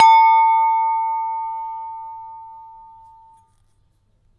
A large Belgian Beer goblet favoured for gin and tonic chez moi. Recorded in mono with an AKG 414, Fredenstein mic amp, RME Fireface interface into Pro Tools
alcohol, aperitif, cheers, gin-and-tonic, glass